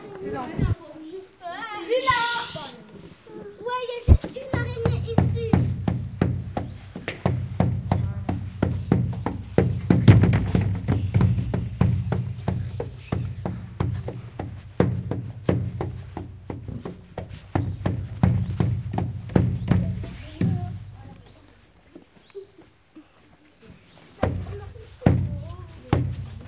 Sonicsnaps-OM-FR-taper-le-vitre
Someone taps on a window pane.